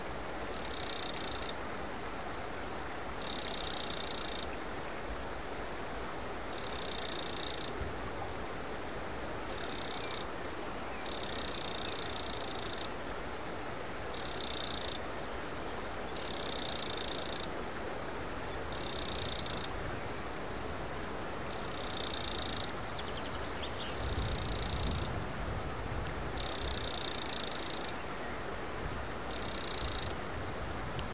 sound of the cicada in nature
Sound of the cicada singing, mixed with other sounds of nature.